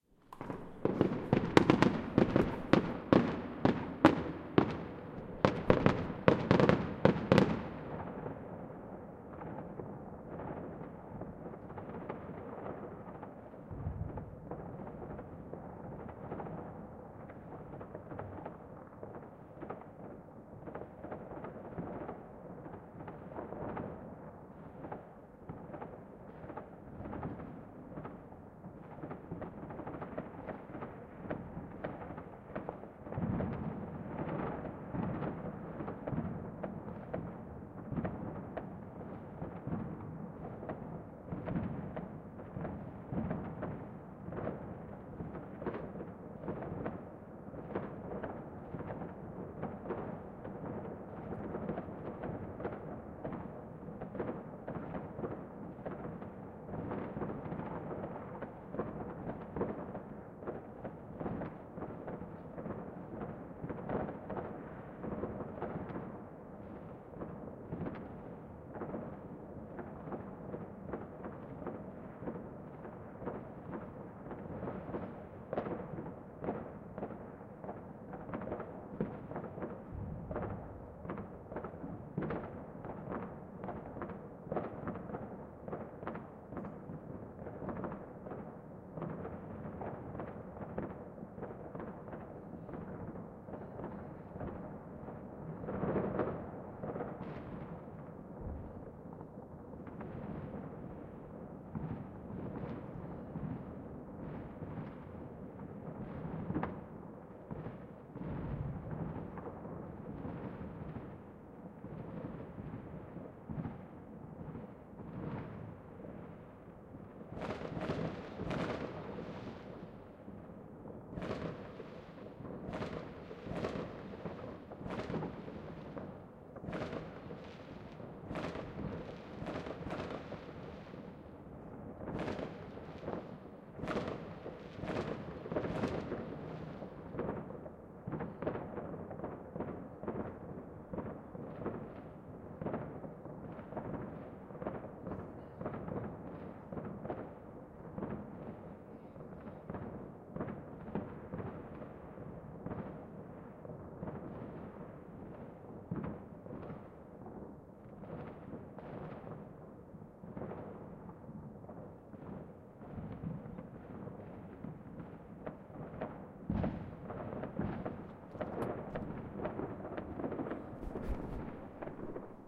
distant fireworks 2019 NYE open field Komarno CsG
New Years Eve in Komarno. Close and echoy distant fireworks D100
bang, bangs, celebration, explosion, fireworks, new-year, new-years-eve, pyrotechnics